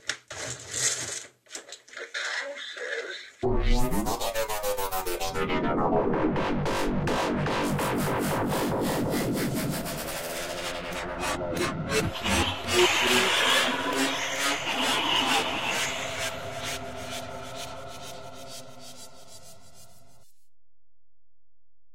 the cow says
Sampled a See N Say...
cattle, cow, moo, mooing